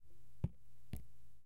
Drops on paper.